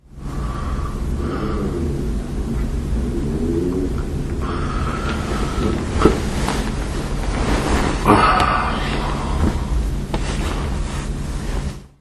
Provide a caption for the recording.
Moving while I sleep. I didn't switch off my Olympus WS-100 so it was recorded. The neighbour's moped didn't wake me up.